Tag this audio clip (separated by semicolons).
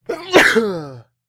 cold; flu; ill; sick; single; sneeze; sneezing